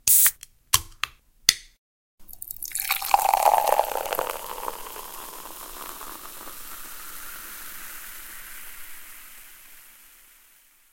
Opening can pouring
pouring, coke, pour, opening, open